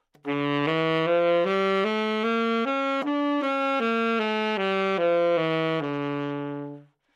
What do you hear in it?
Part of the Good-sounds dataset of monophonic instrumental sounds.
instrument::sax_tenor
note::D
good-sounds-id::6262
mode::harmonic minor